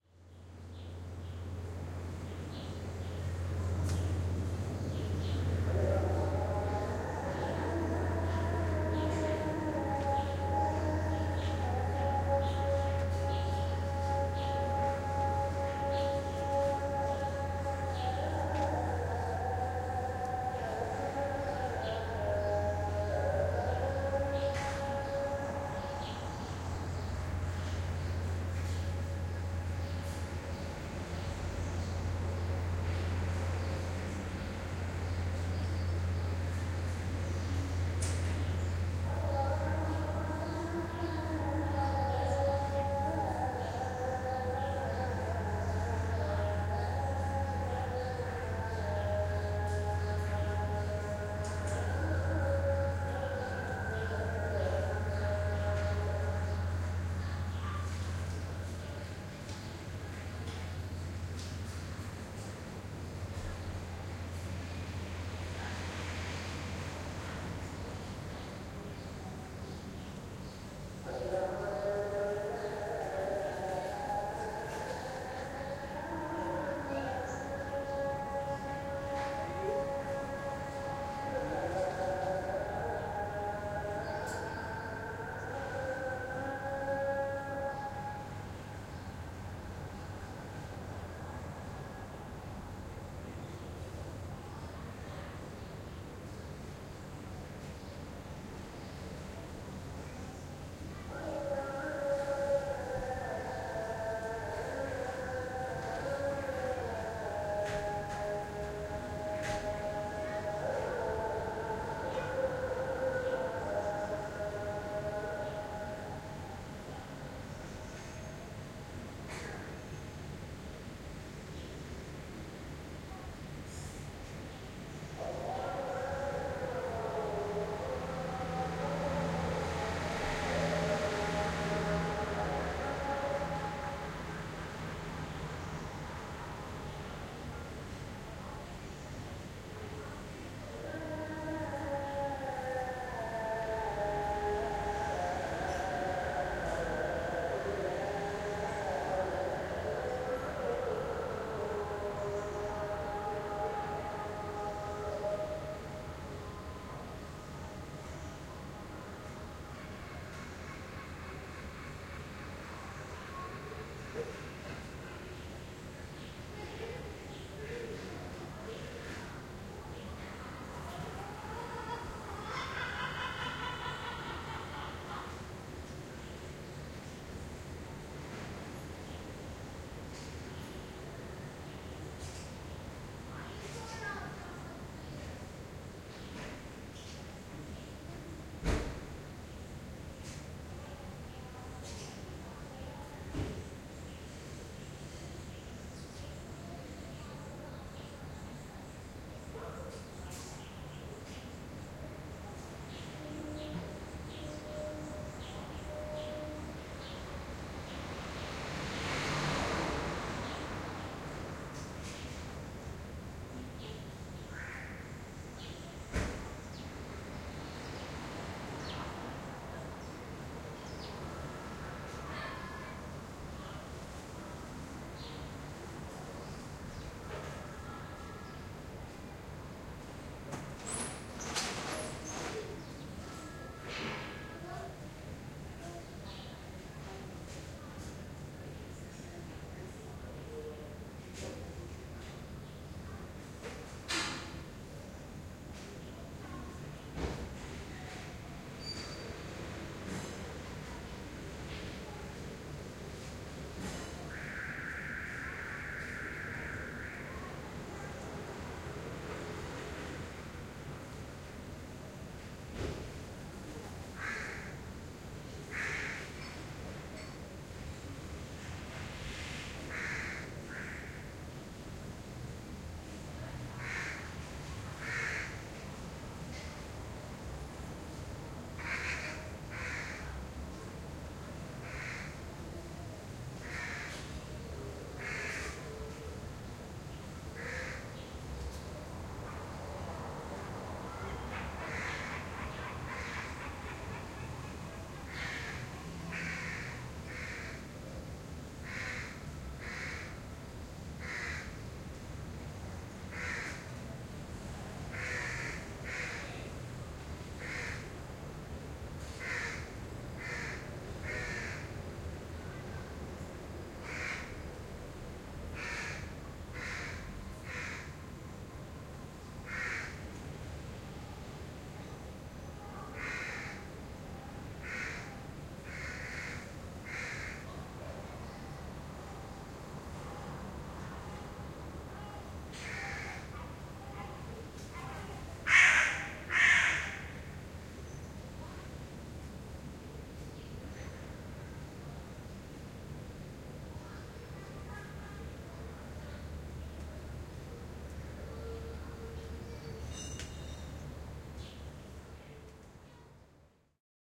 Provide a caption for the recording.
Backyard, mosque call to prayer, street and seagull.
Backyard, birds, call, city, Istanbull, mosque, prayer, street